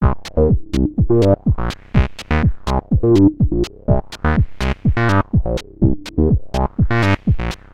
Groove 1-Audio
Made in Ableton with various synths and effects. groove synth fat layer beat phat 124bpm
1, beat, clean, club, dance, deep, dry, filler, groove, heavy, loop, synth